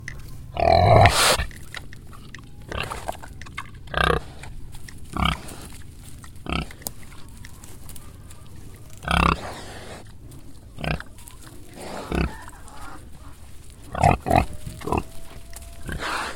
Pig Breath And Grunt 02
A pig angrily grunts at me and then shakes its flappy skin. I sort of chase it (I don't think you can hear me though) and it slowly walks away through the dirt/grass.
grunting, pig, sniffing, angrily, animal, flappy, grunt, sniff, groaning